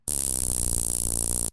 This is a taser being activated.
electricity, shock, spark, taser, zap